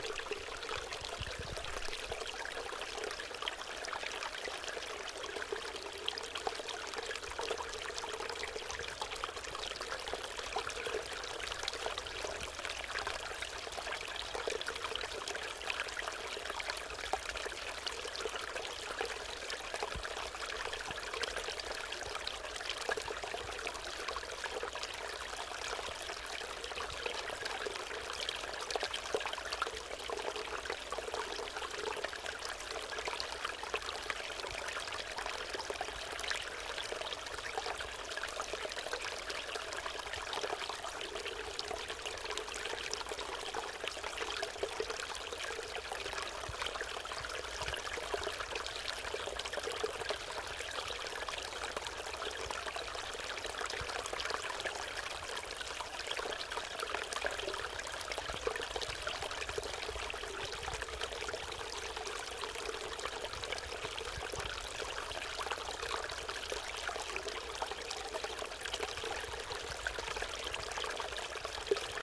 Stream-tight in on little fall
shotgun mic about 6 inches away from tiny fall in stream
brook, field-recording, nature, splash, stream, trickle, water, water-fall